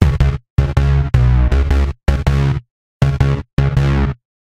buzz bass 160bpm c#
buzz, fuzz, synth, techno, electro, house, electronic, loop, bass